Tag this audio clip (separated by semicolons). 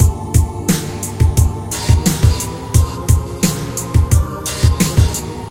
loop; progression; phase; drum-n-bass; chill; drumloop; drum; 175-bpm; pad; beat